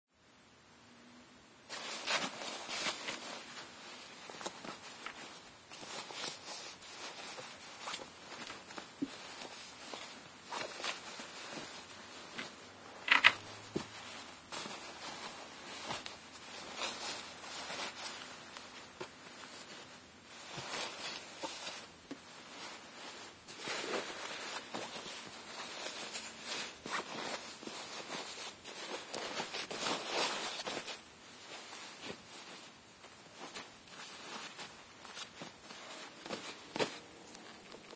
Clothes Movements Foley
buscando, cloth, clothes, clothing, dressing, fabric, fibra, look, looking, material, movement, moviendo, moving, ropa, ropas, sheet, tear, tearing, tela, textil, textile